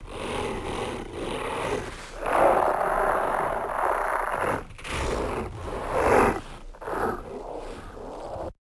3 Headed Dog
I made this sound using my vocals and untuning them on each layer audio of the same sound.
Animal, Attack, Big, Breath, Creature, Dog, Eating, Fantasy, Fire, Growl, Horror, Large, Monster, Roar, Scary, Scream, Slow, Snarl, Whoosh, Zombie